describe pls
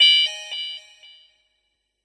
GUI Sound Effects 075
GUI Sound Effects